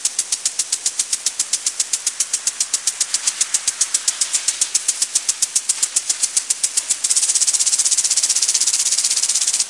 My rainly bird impact sprinkler recorded with my Zoom H6 with a shotgun mic. Processed in Sony Vegas with some Hi-Pass and through an Ultra Maxamizer.